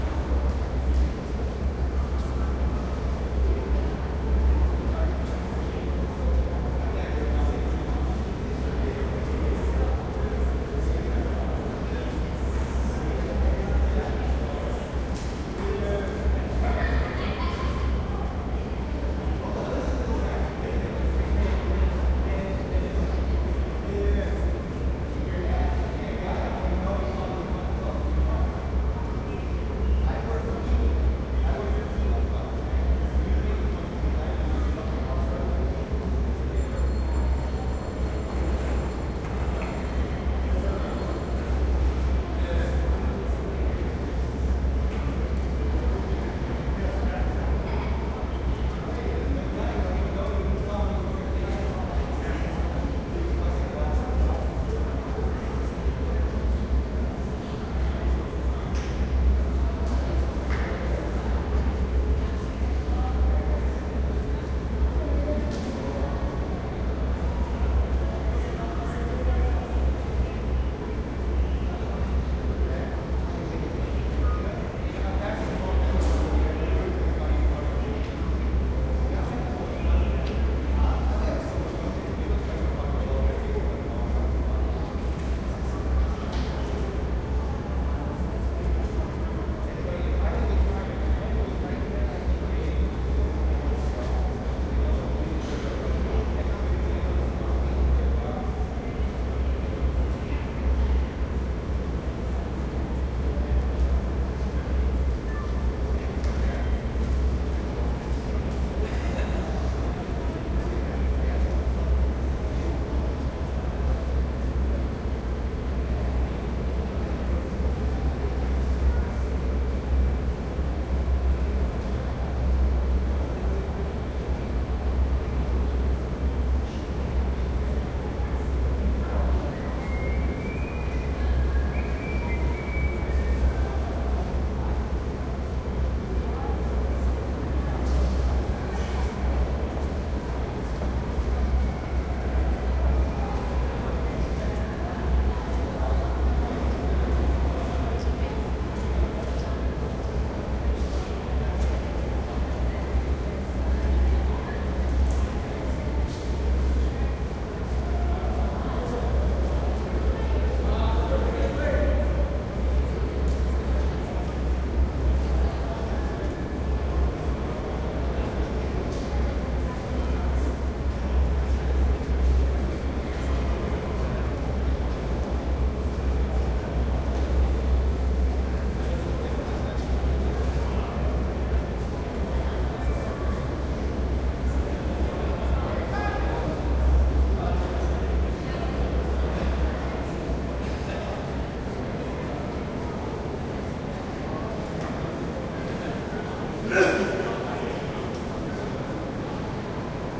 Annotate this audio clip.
metro subway platform light crowd heavy ventilation and hum Montreal, Canada
Canada, Montreal, crowd, heavy, hum, light, metro, platform, subway, ventilation